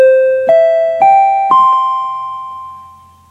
Tannoy chime 01
An imitation of a chime you might hear before an announcement is made.
chime, melody